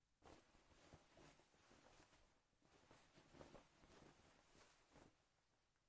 Pushing through curtain or cloth 4
Passing through a curtain or cloth. recorded with a Roland R-05